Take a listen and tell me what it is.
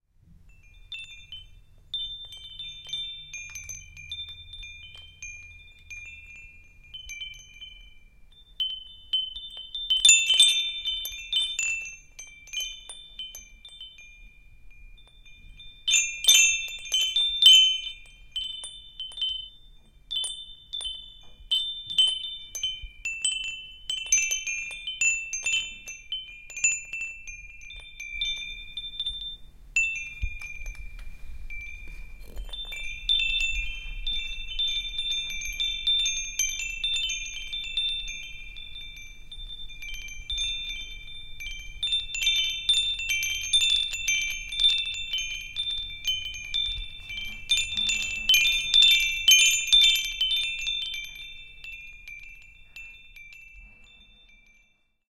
chimes on my door
bells, wind-music, chimes